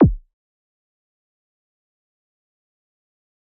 DSP ZipKick 01
So with that being said I'm going to be periodically adding sounds to my "Dream Sample Pack" so you can all hear the sounds I've been creating under my new nickname "Dream", thank you all for the downloads, its awesome to see how terrible my sound quality was and how much I've improved from that, enjoy these awesome synth sounds I've engineered, cheers. -Dream
Frequency, EQ, Frequency-Modulation, FM, Synthesizer, Deep, Sub, 808, Kick, Equalizing, Layering, Electronic, Hip-Hop